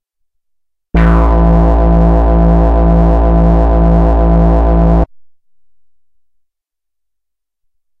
SW-PB-bass1-A1
This is the first of five multi-sampled Little Phatty's bass sounds.